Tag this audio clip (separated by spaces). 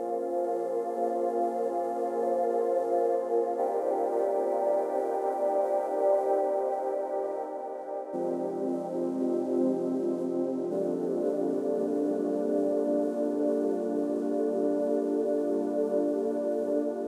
chilly harsh pad saw soft warm